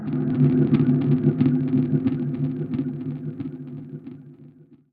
Samurai Jugular - 26
A samurai at your jugular! Weird sound effects I made that you can have, too.
trippy, effect, sci-fi, sfx, sweetener